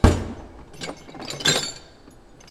mono field recording made using a homemade mic
in a machine shop, sounds like filename